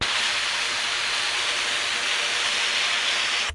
Air blown through a trumpet